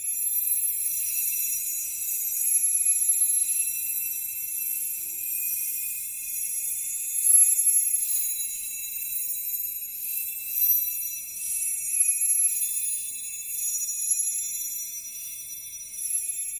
Fairy sound
This is a seamless loop that sounds like "magic energy" it was made from chimes sounds and Paulstretch filter